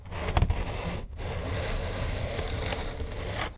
prise de son de regle qui frotte